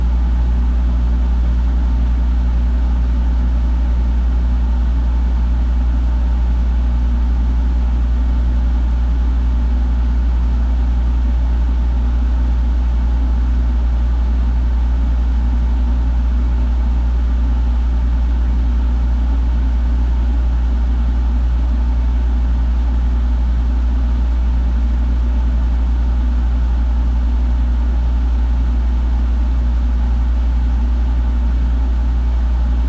Inside the freezer before the icemaker was fixed.
freezer, inside